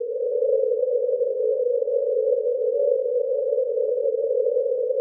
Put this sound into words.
Some multisamples created with coagula, if known, frequency indicated by file name.
chorus, synth, choir, space, multisample